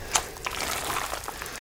Pumpmkin Guts Squish 3
Pumpkin Guts Squish
guts, pumpkin